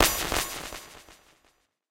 A percussive synth sound with delay.
This is part of a multisampled pack.